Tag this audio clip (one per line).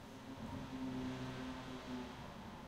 recording
squeaks